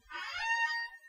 distant creak2
leaning on my computer chair that desperately needs some WD-40. this time, my mic is a bit further away. for reasons I cannot control, there may be noise.
chair, creak, squeak